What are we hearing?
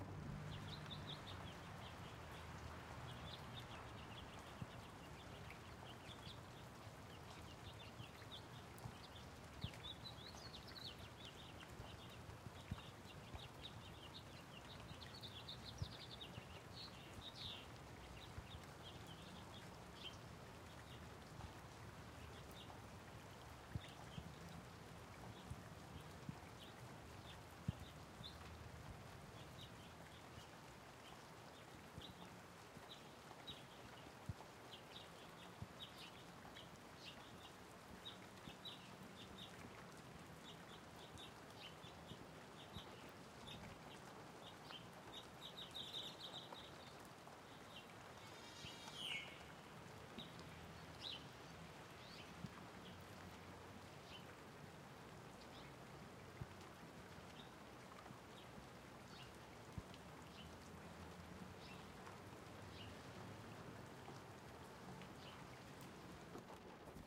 Light Rain with Birds in the background.
rain, birds, light, field-recording, nature